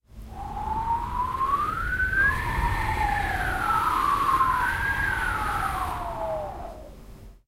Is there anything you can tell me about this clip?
Whistling into the microphone to produce a wind sound
blow, gust, whistle, wind